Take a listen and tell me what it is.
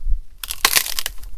Sound of cracking wood